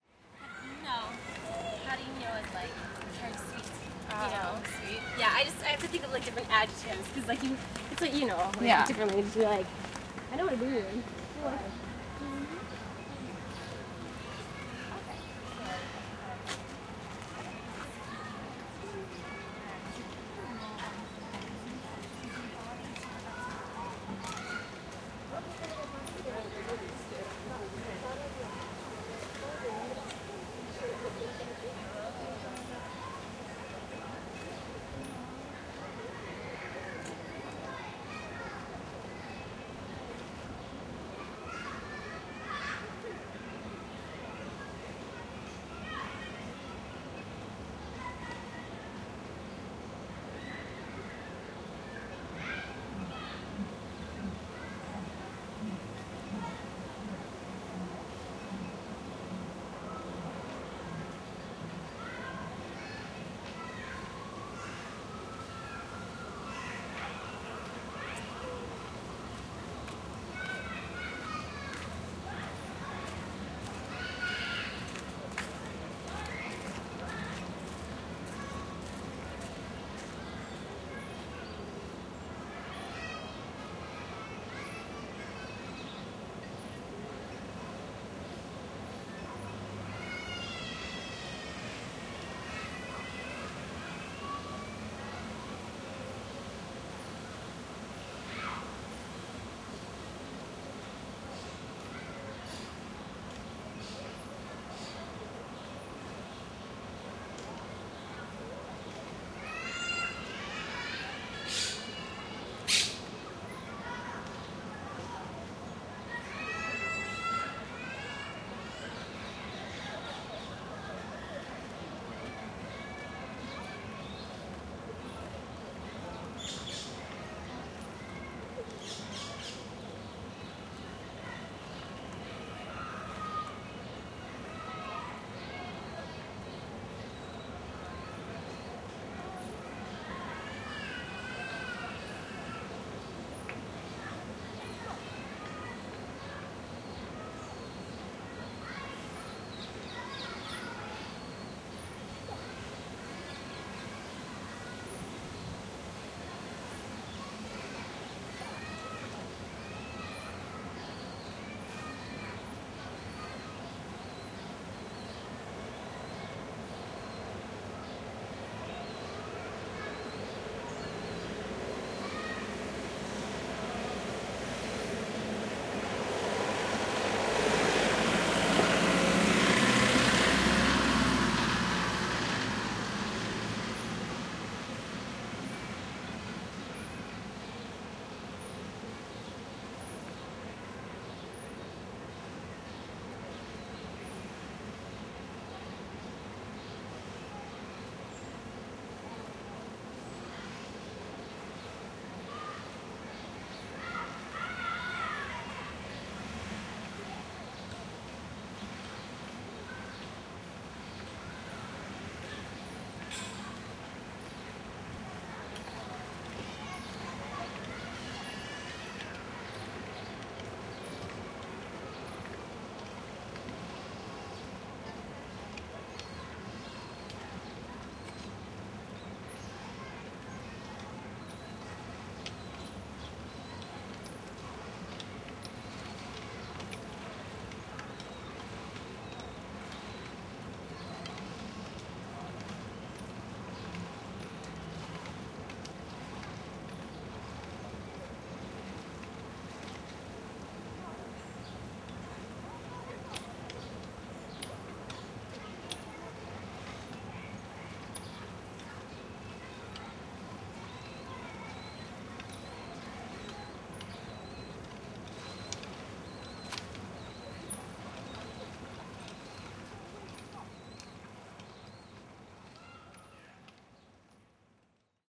Ciudadela’s park looks of no interest for people in rainy days like this one. We almost can find someone walking by. Just a group of lonely tourist walking by from time to time. Far away we hear a group of Child's playing, or crying for their mothers, but that’s the zoo, very next to the park, no at the park itself. We hear clearly the birds, which appear delimiting their sound space. Suddenly… a car? In the middle of the park? Sure. Cleaning services. They had modernized their infrastructures in order to keep servicing a so modern, populous and big city. No more brooms. At the end of the shoot, some hand-ship could be heard.